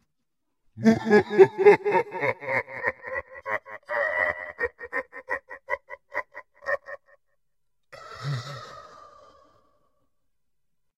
low laugh reverbed
low male laugh (with little reverb)
reverbed, low-laugh